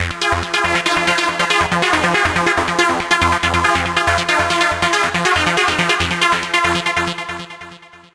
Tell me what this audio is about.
Psy Trance Loop 140 Bpm 01
Loop, Trance